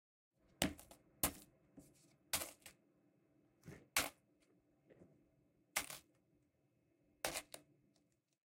Cracker Foley 5 Far
Graham cracker foley recorded with a pair of mics in XY stereo arrangement (close), and small diaphragm condenser mic (far) running parallel. Processed in REAPER with ambient noise reduction, compression, and EQ. Each file mixed according to the title ("far" or "close" dominant).
cookie, cookies, cracker, crackers, crumble, crumbles, crumbling, design, dry-bread, dust, dusting, effects, foley, food, foods, footstep, gamesound, gingerbread, graham, pop, sfx, sound, sound-design, sounddesign, step, steps